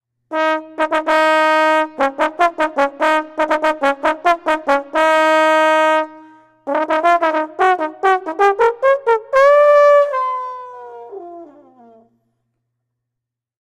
This is just a short fanfare used to announce a king in a short play. After the fanfare the horn player goes into a jazz riff and is stopped. Take 1
The is a recording I made for a fund raiser i am taking part in.
trombone, fan, announcement, horn, fare, royal, fanfair, trom, king, fanfare, jazz, trumpet